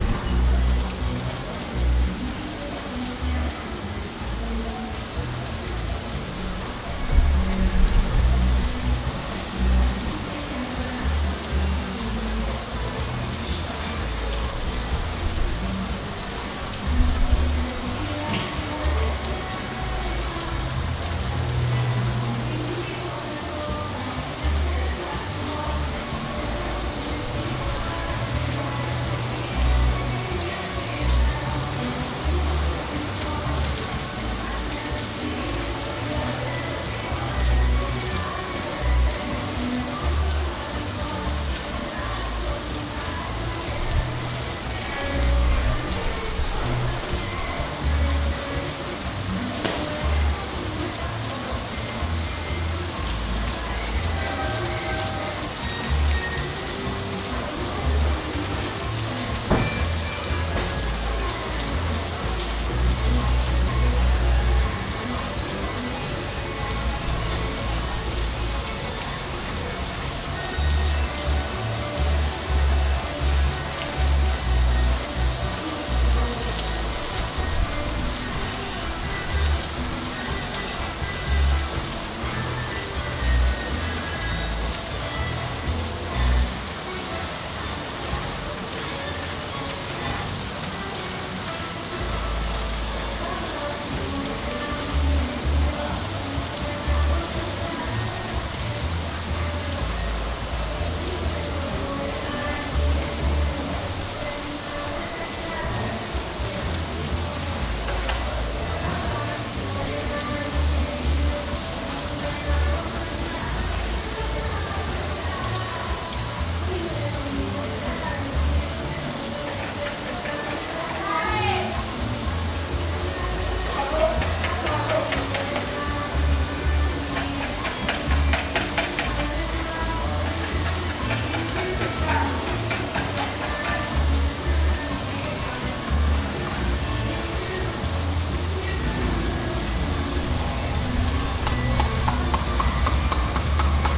Rain and Street Noise in Centro Habana - Nighttime
The sound of the street and rain at nighttime from a fourth floor window on Amistad St in Centro Habana.
Recorded with a Zoom H2N
cars, central, cuba, field-recording, rain, traffic